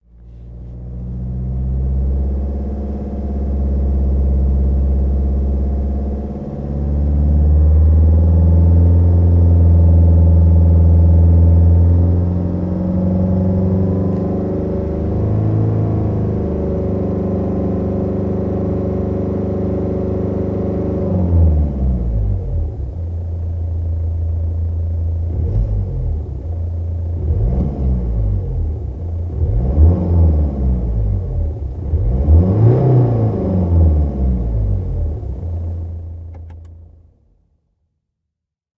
RFX Aceleration Inside the Car
Sound recorded inside a car. BMW motor acceleration
Mic Production
car, engine, field-recording, motor, vehicle